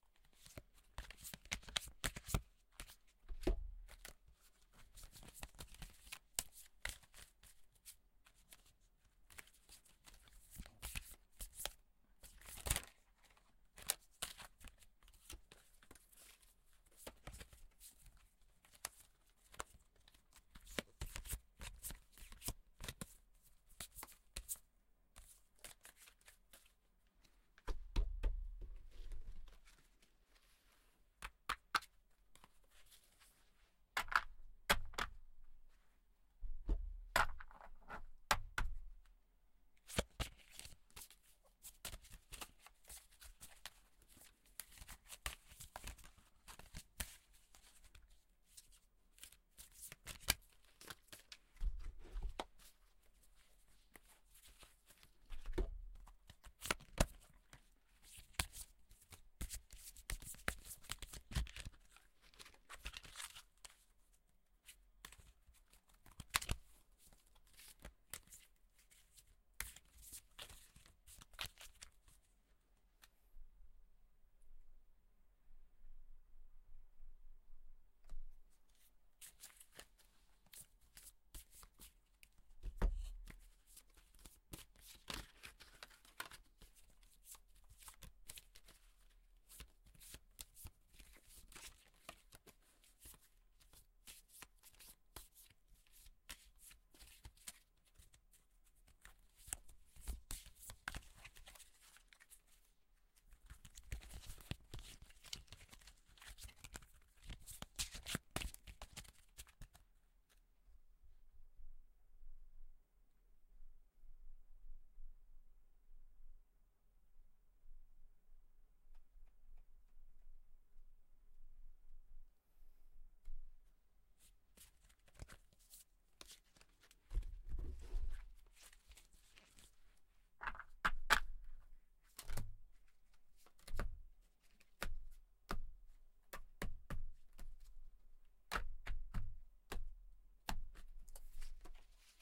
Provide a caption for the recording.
card fiddling
Cards being handled.
card, deck, notecards, paper